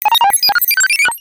Computer sounds accepting, deleting messages, granting access, denying access, thinking, refusing and more. Named from blip 1 to blip 40.
blip, sound